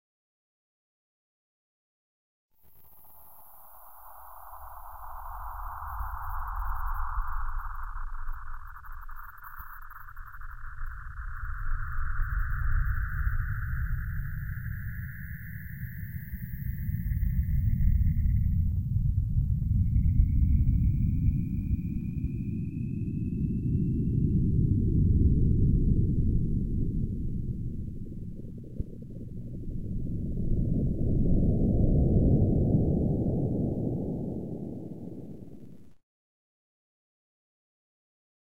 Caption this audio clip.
Power Star Rumble
I took Power Star for Rumble in VirtualANS.
VirtualANS, Star, Rumble, Power